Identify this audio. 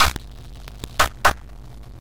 industrial loop mono
techno, electronic, rythm, effect, loop, clap, drum, electro, dance, expeimental, industrial, ambience, glitch, beat, ambient, sfx, noise, rhytmic, loops, clapping, drum-loop